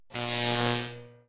An electric arc climbing a Jacob's ladder, a zap
Original recording: "Jacobs Ladder from Side" by Parabolix, cc-0